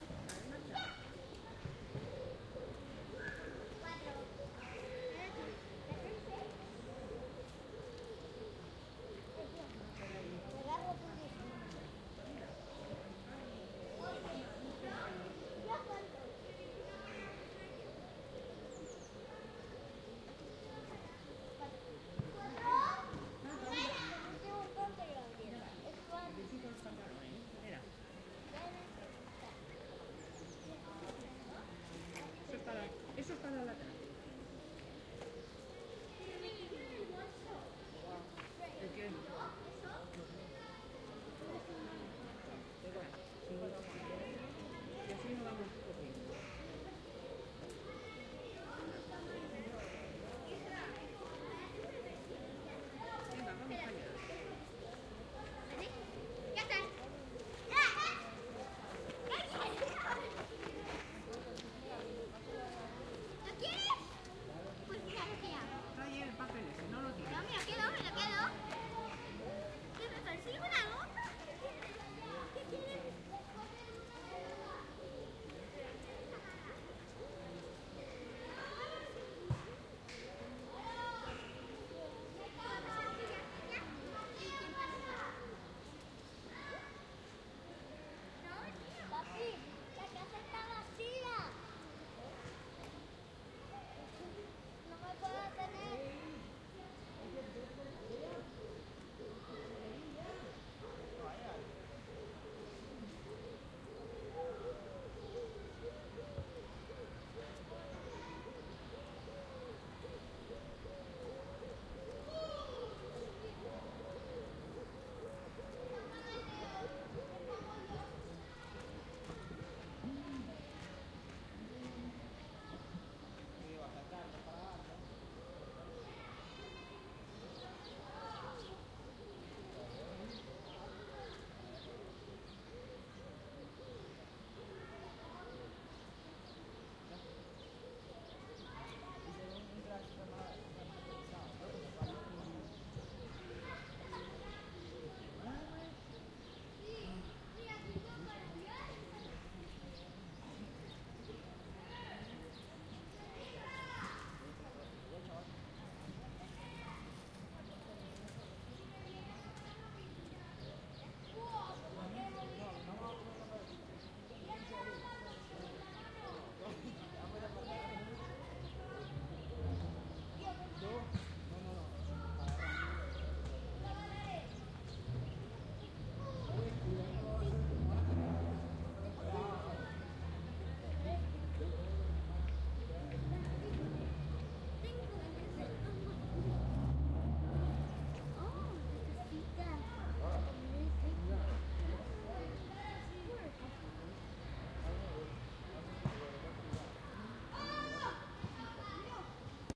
The sound of a park in Huesca (Spain). You can hear the children playing and the people hanging out. Also a good sound of the birds and the environment of the park.
I used the digital recorder Zoom H6.